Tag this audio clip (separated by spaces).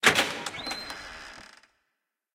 Door DoorOpen DoorOpening